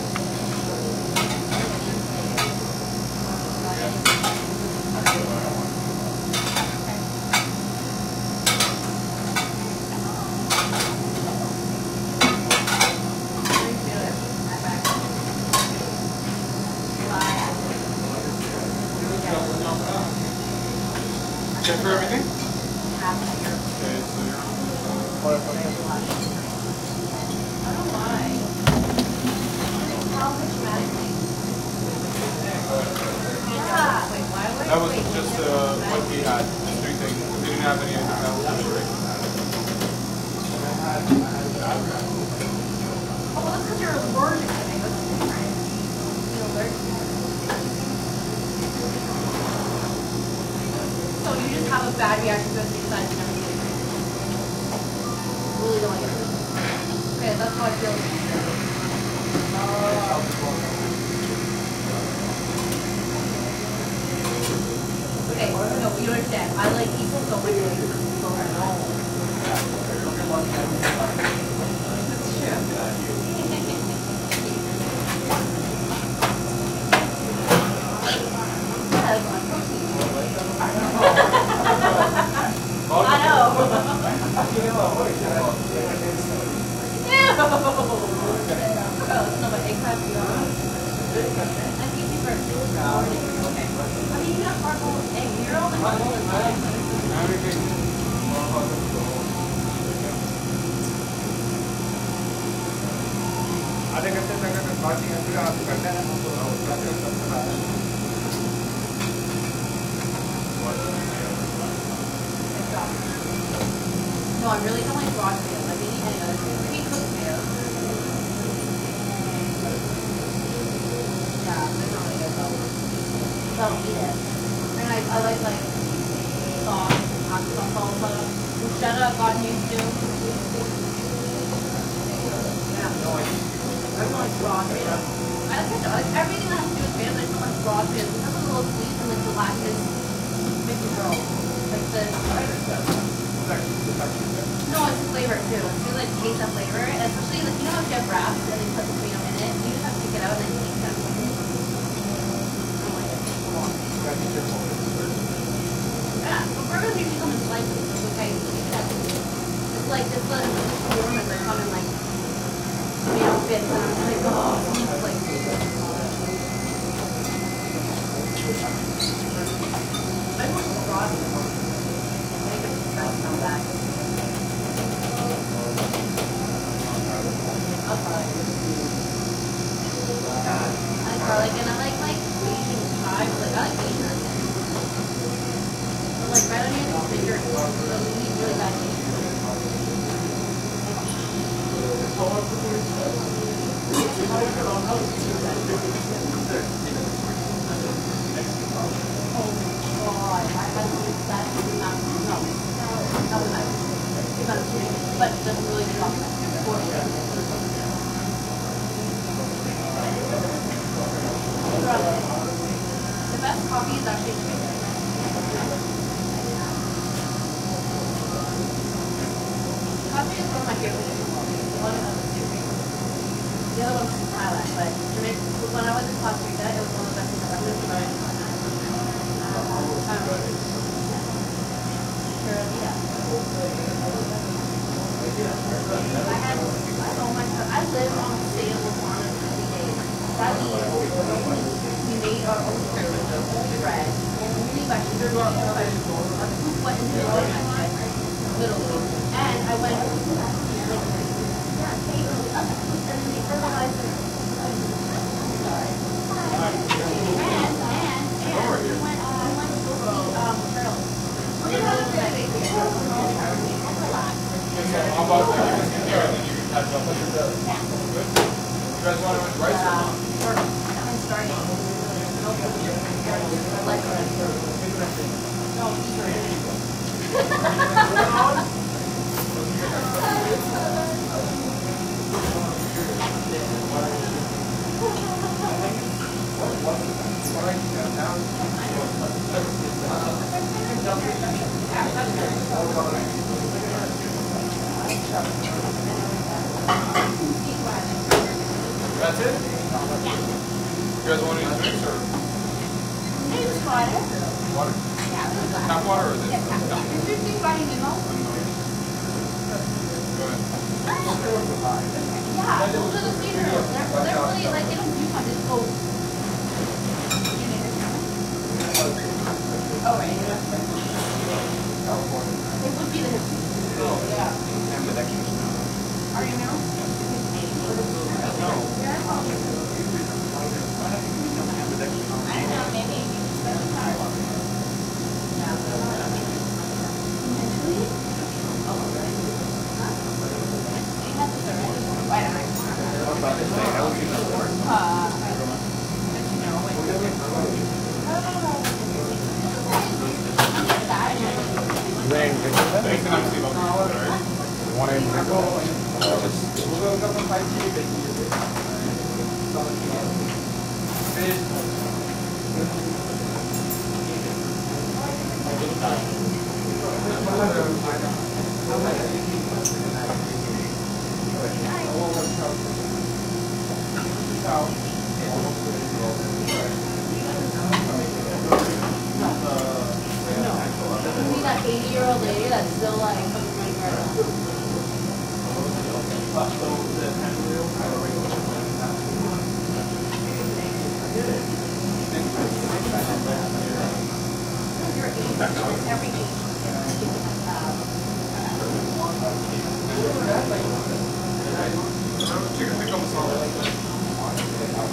Restaurant Crowd and Buzz
recorded on a Sony PCM D50
xy pattern
Buzz, Crowd